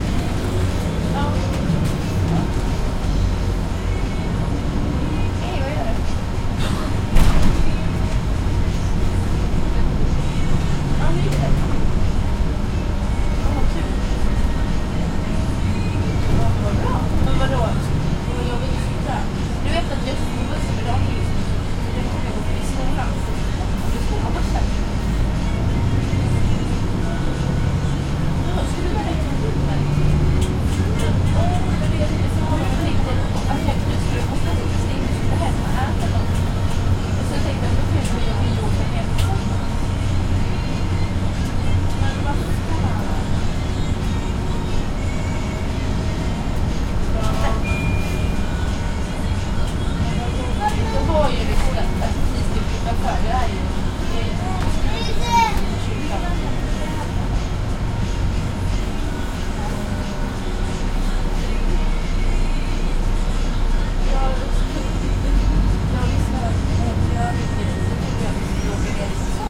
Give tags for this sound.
inside; bus; driving; people; motor; engine

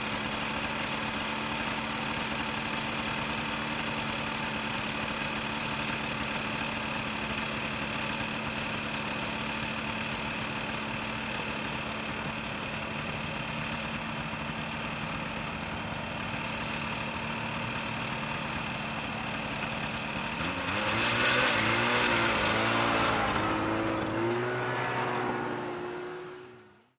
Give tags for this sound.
snowmobile; idle; pull; slow; away

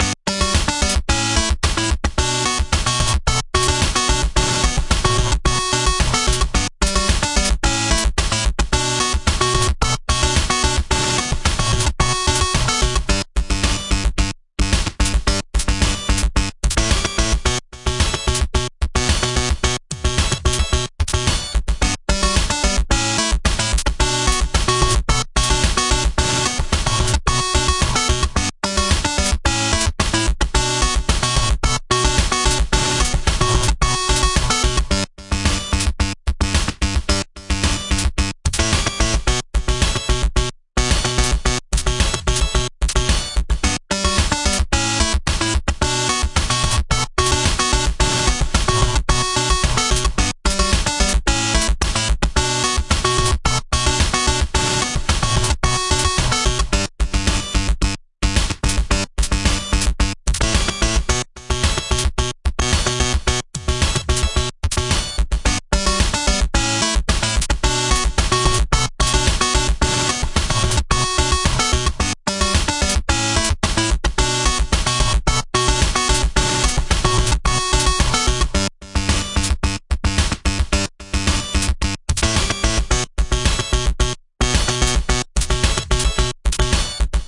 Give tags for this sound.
8bit; Funny; Game; Source; Video

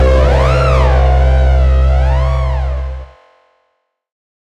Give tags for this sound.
processed; synth; hardcore; bounce; glitch-hop; 110; rave; dance; electro; sound; glitch; 808; bpm; bass; trance; beat; noise; resonance; porn-core; effect; house; 909; sub; dub-step; club; techno; synthesizer; acid; electronic

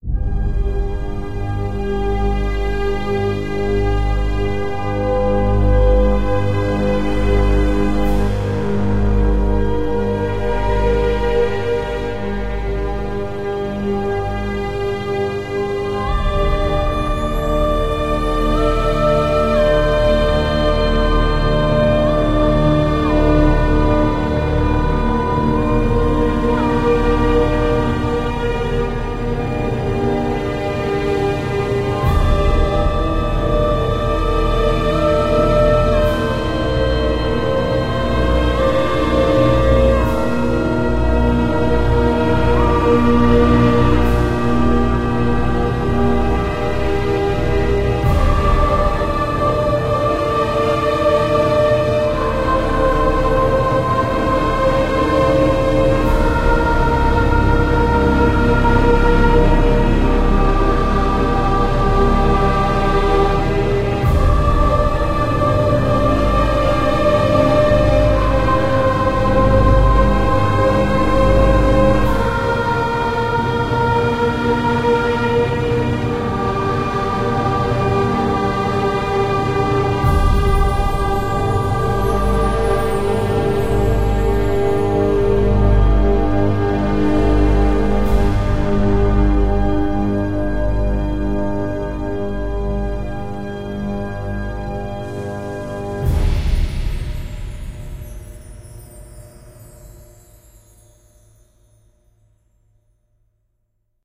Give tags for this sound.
choir; classical; experimental; flute; instruments; music

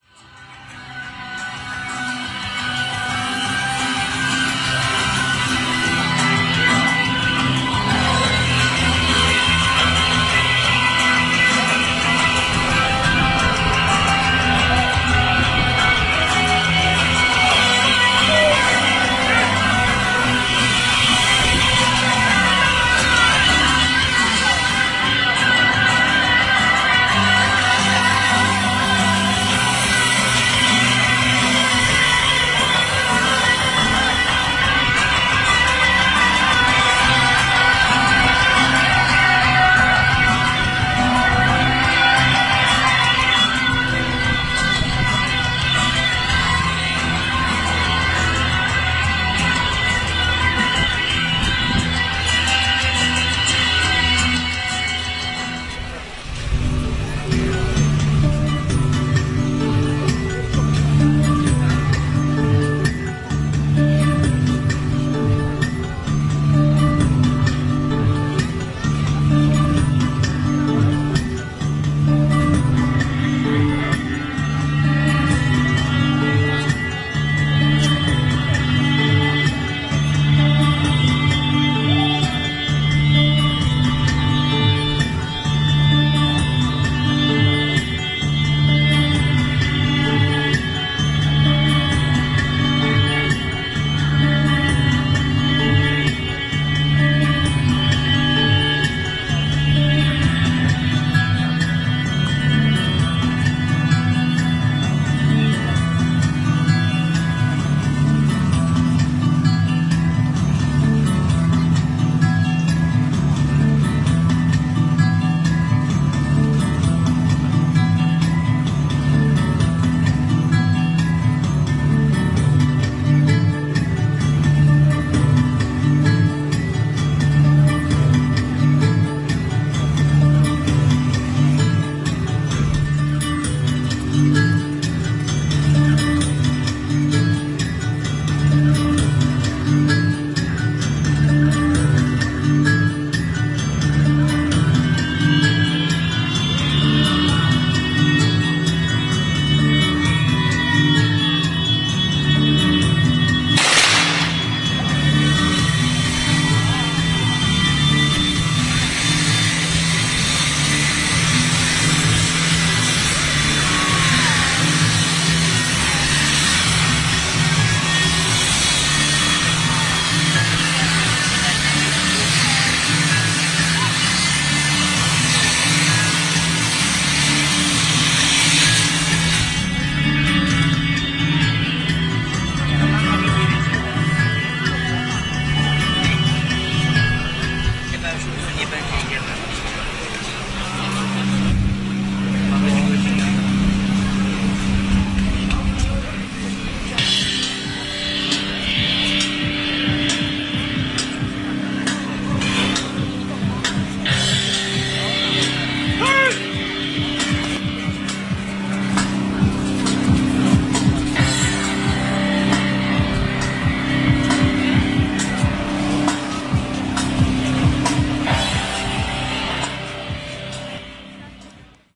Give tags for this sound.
crowd; festival; fireworks; karnavires; malta; performance; poland; poznan; theatre

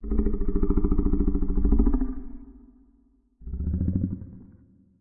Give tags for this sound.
beast; cave; creature; growl; monster; scary

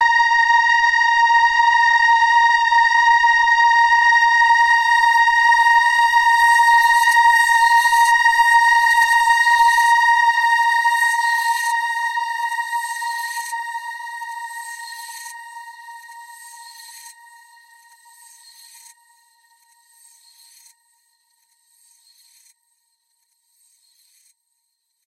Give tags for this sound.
ambient; multi-sample; multisample; granular; synth; dark

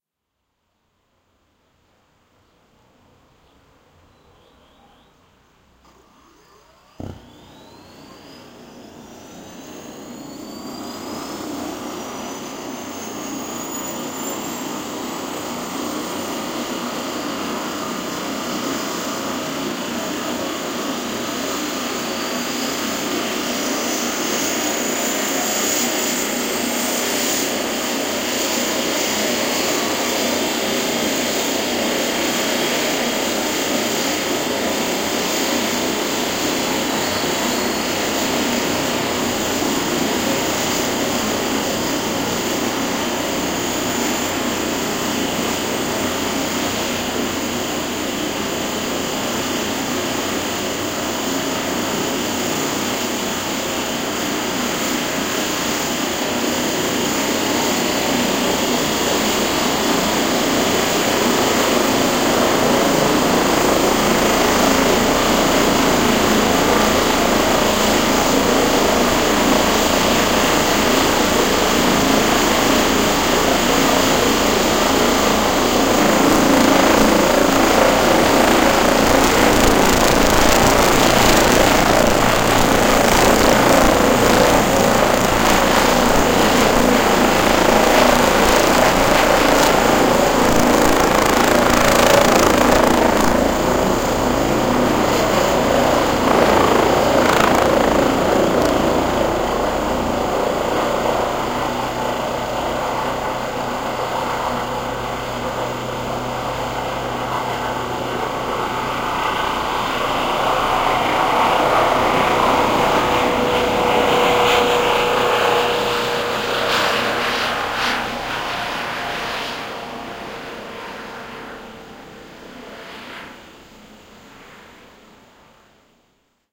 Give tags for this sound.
engine; field-recording; helicopter; hubschrauber; noise; start; starting

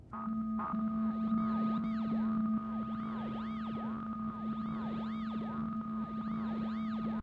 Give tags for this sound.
breakdown; restart; switched-on; news; turn-on; tv

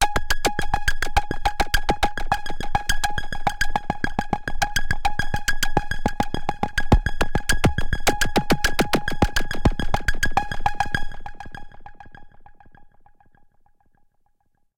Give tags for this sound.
130bpm; arpeggio; electronic; loop; multi-sample; synth; waldorf

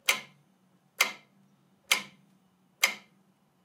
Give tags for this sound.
bpm; metronome; metronome-loop

